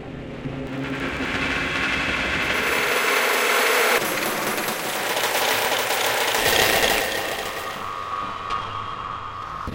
dub drums 020 dubjazz
drums, dub, experimental, reaktor, sounddesign